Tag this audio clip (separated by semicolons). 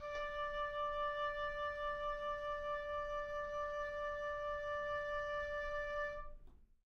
single-note; multisample; midi-velocity-31; oboe; midi-note-74; woodwinds; d5; vsco-2; vibrato